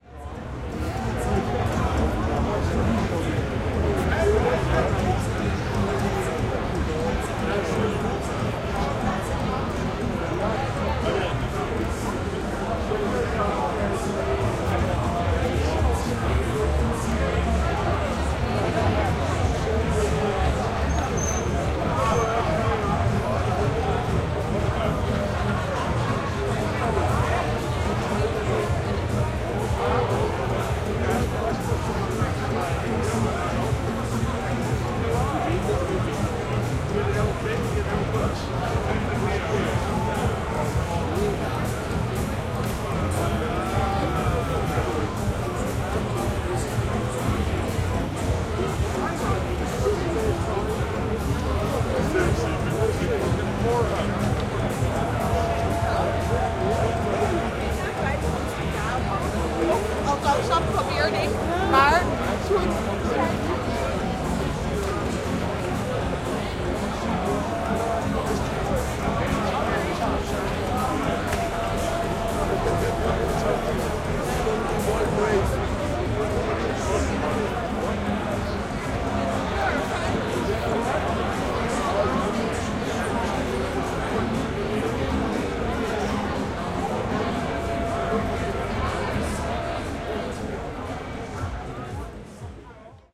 I have recorded this file on a fridaynight on leidseplein square in Amsterdam, Holland.
crowd, exterior, field-recording, music, night, talking